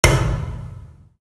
VSH-37-pop-metal pipe-short
Metal foley performed with hands. Part of my ‘various hits’ pack - foley on concrete, metal pipes, and plastic surfaced objects in a 10 story stairwell. Recorded on iPhone. Added fades, EQ’s and compression for easy integration.
crack, fist, hand, hit, hits, human, kick, knuckle, metal, metal-pipe, metallic, metalpipe, percussion, pop, ring, ringing, slam, slap, smack, thump